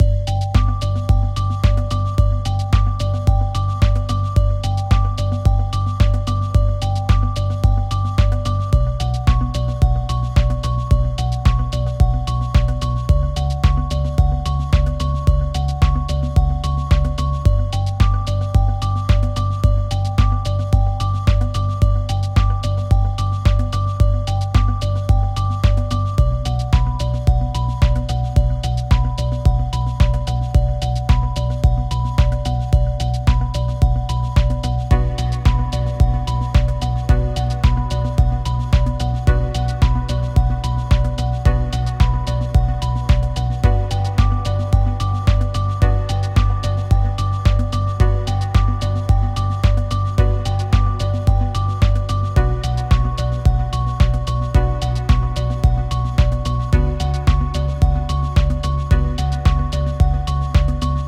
bells electronic loop.
synth list:sylenth,massive,ableton live.